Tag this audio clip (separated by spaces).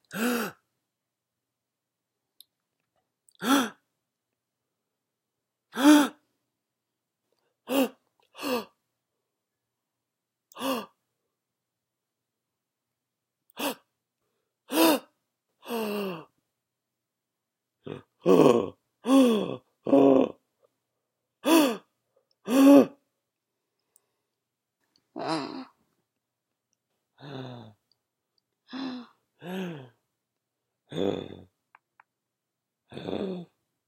air breath breathing gasp horror human inhale male scare scared surprise vocal